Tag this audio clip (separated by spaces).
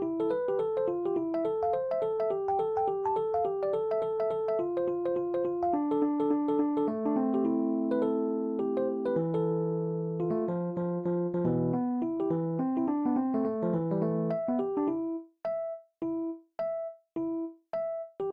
stuff cut hiphop